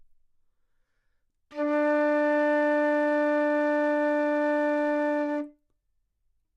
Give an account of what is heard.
Part of the Good-sounds dataset of monophonic instrumental sounds.
instrument::flute
note::D
octave::4
midi note::50
good-sounds-id::3024